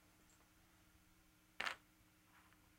FX Board Game Metal Piece
board, game, pawn, piece